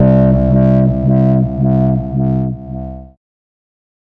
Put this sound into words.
Acid Bass: 110 BPM C2 note, not your typical saw/square basslines. High sweeping filters in parallel Sampled in Ableton using massive, compression using PSP Compressor2 and PSP Warmer. Random presets, and very little other effects used, mostly so this sample can be re-sampled. 110 BPM so it can be pitched up which is usually better then having to pitch samples down.
110, 808, 909, acid, bass, beat, bounce, bpm, club, dance, dub-step, effect, electro, electronic, glitch, glitch-hop, hardcore, house, noise, porn-core, processed, rave, resonance, sound, sub, synth, synthesizer, techno, trance